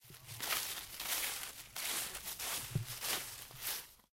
Sound Description: people are walking through leaves
Recording Device: Zoom H2next with xy-capsule
Location: Universität zu Köln, Humanwissenschaftliche Fakultät, Gronewaldstraße
Lat: 50.9331283114816
Lon: 6.919224858283997
Recorded by: Carolin Weidner and edited by: Marina Peitzmeier
autumn, cologne, fall, field-recording, footsteps, leaves